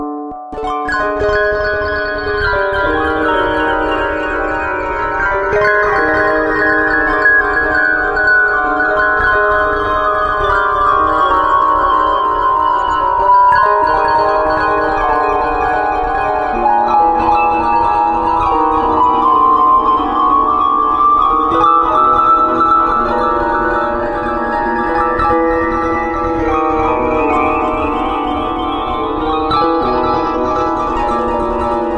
I was testing some VSTis and VSTs and recorded these samples. Some Ausition magic added.
Harp-like sounds of a guitar VSTi.
Loopable for 120 bpm if you know what to do (I do not).